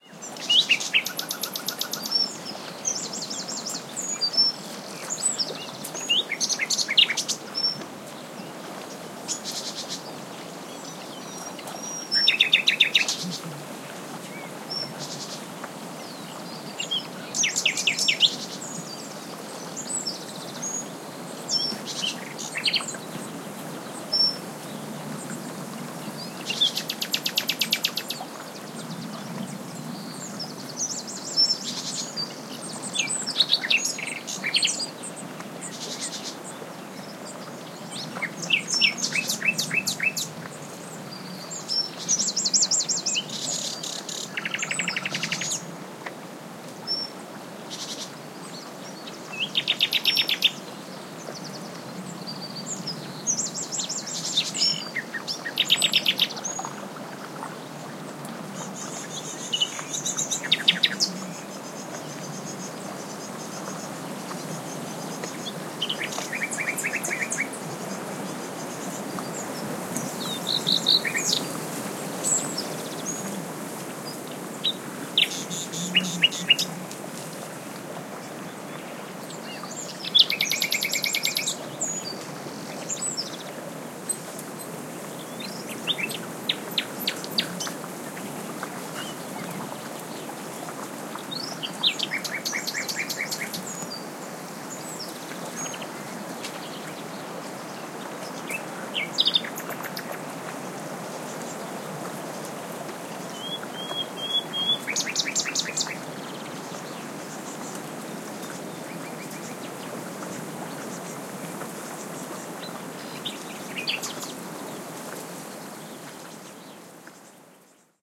20190507.nightingales.river
Nightingale singing, with wawelets, wind, and other birds (warblers, tits) in background. EM172 Matched Stereo Pair (Clippy XLR, by FEL Communications Ltd) into Sound Devices Mixpre-3. Recorded near Fuente de la Geregosa (Santiago de Alcantara, Caceres Province, Extremadura, Spain)